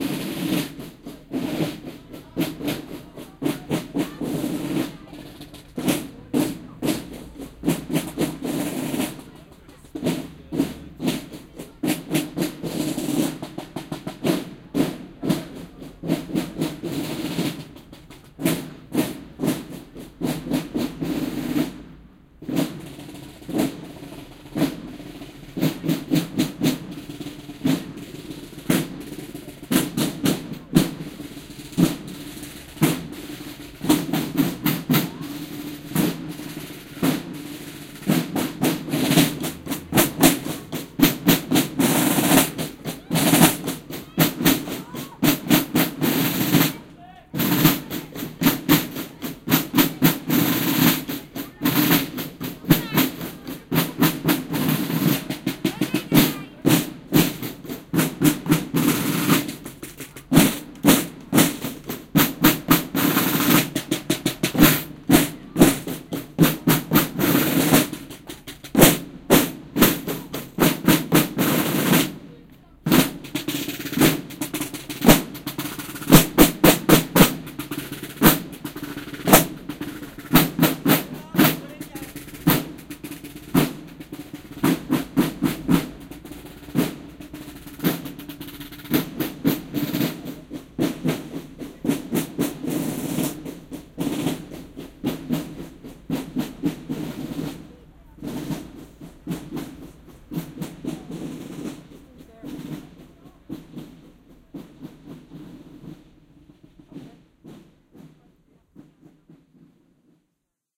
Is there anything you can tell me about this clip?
April 24 8pm Bergen, Norway. Boy's Marching Band through the city centre by the water. Sun has still not set. Fine mist of rain falling around us.